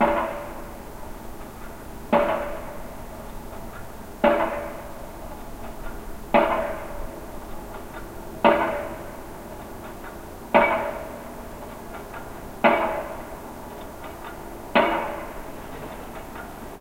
6AM Pile Driver
An unrequested alarm call - a pile driver at 6AM on a Sunday morning. Recorded on a Yamaha Pocketrak PR7.
Industrial, Steampunk